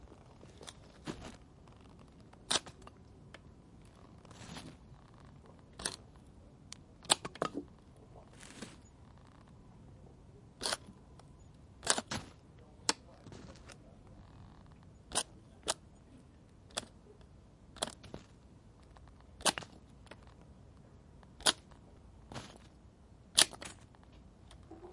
Bypass Lopper Branch Cutter
Bypass loppers branch cutter cutting / clipping / snapping wooden sticks and branches. Recorded with Zoom H2n.
Lopper
branch, branches, Bypass, clipping, cutter, cutting, lopper, loppers, snapping, sticks, wooden